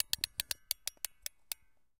Winding up a music box.